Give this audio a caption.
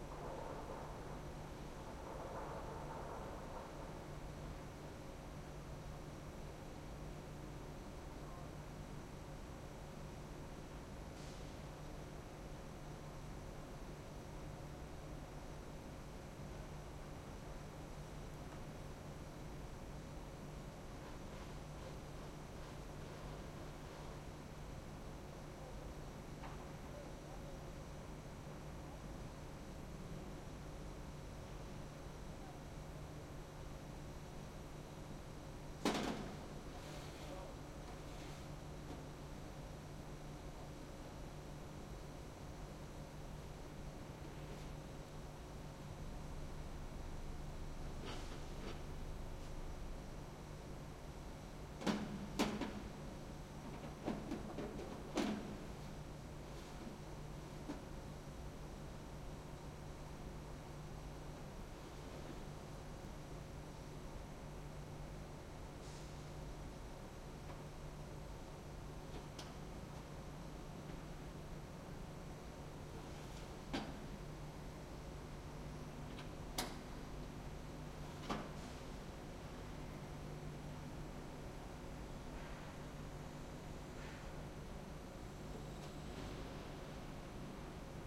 in front of few generators with door slaming nearby